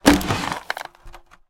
Breaking a single wooden barrel.